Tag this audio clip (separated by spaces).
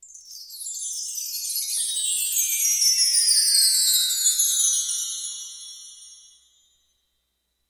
glissando orchestral wind-chimes windchimes percussion chimes